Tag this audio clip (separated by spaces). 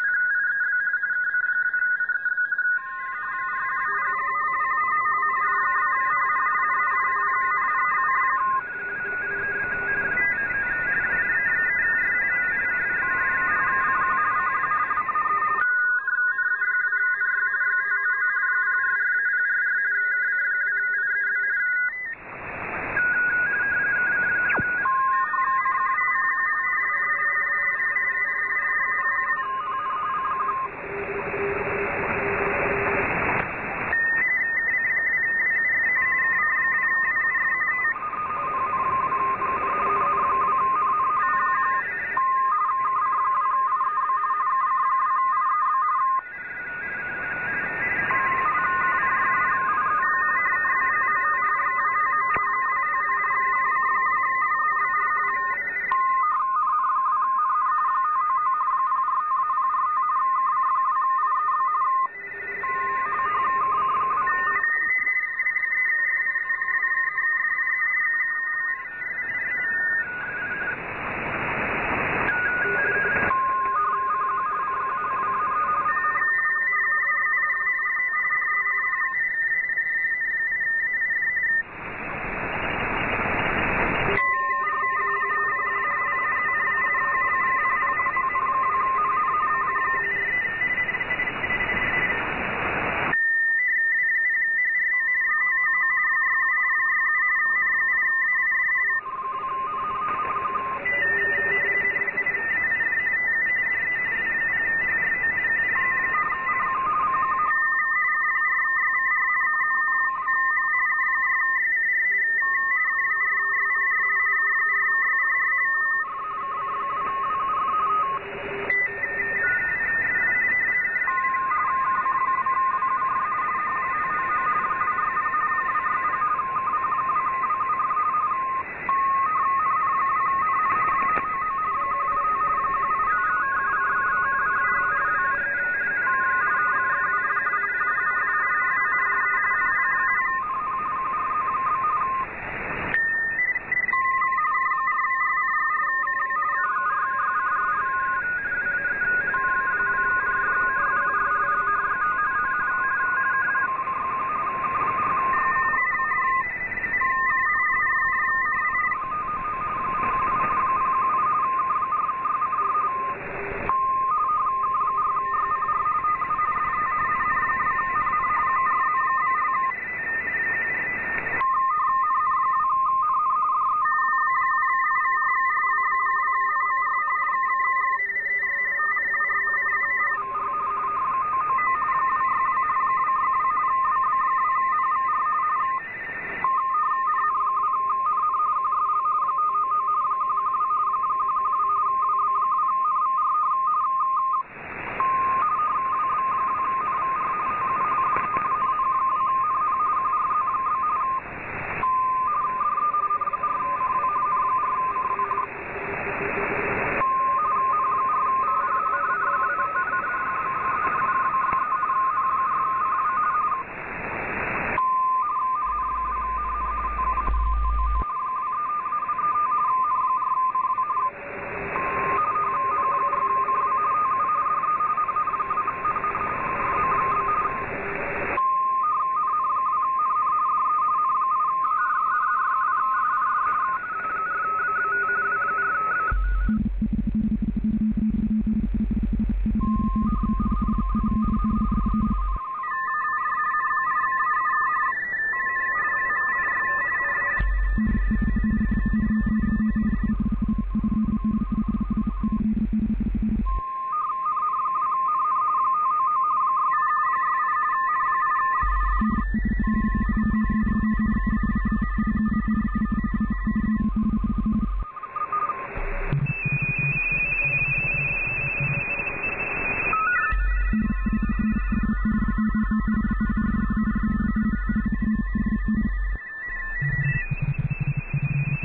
Ham-radio shortwave-radio WEBSDR Shortwave Communication RTTY